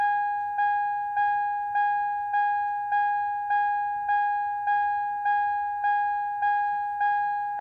Car Seatbelt Alarm
Car seatbelt warning
bell, chime, ding